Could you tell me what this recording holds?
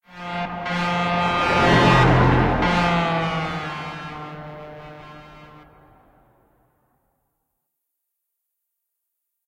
Truck Horn Passing By Left To Right
I created this big truck sound by tunning a virtual electric guitar program. And the i added road sound and made it on one side of the speaker to another. Left to Right speakers in this case. I feel it sound more good for horror genre. Its all free enjoy.
FREE
Hitting, Road, Hit-Horn, Right, Horn, Drive, Driving-By, Big, Big-Truck, Highway, Left-To-Right, Press-Horn, Passing-By, Left, Transport, Semi-Truck, Trucker, Truck, Passing